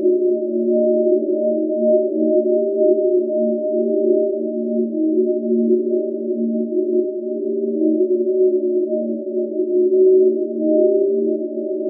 cloudcycle-cloudmammut.88
space, ambient, drone, divine, soundscape, evolving